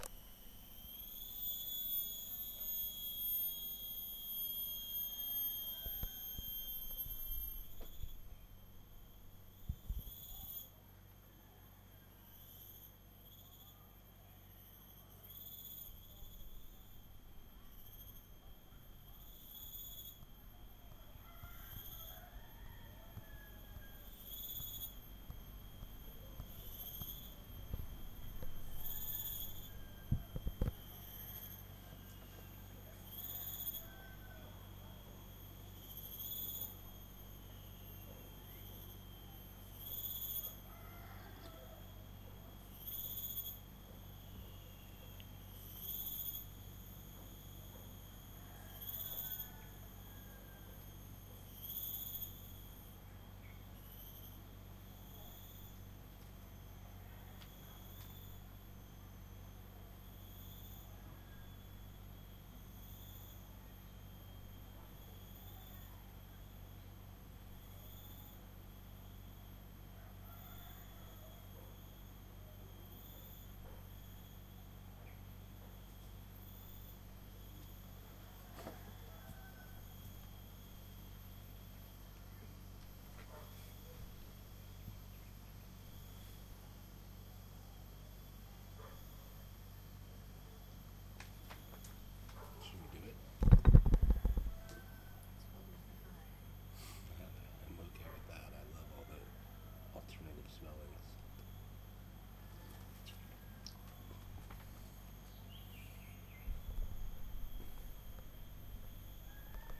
Hi pitch insects recorded on a warm December 2013 afternoon in Ubud, Bali. Recording device was the Zoom H2